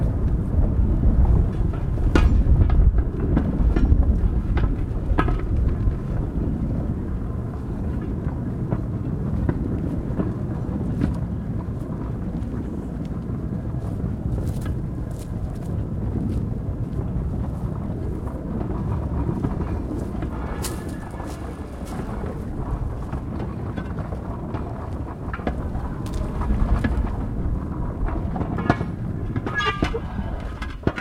Hand cart on rails
Stereo recording, Tascam DR-40. Location: Patagonian steppe.
desert, rails, cart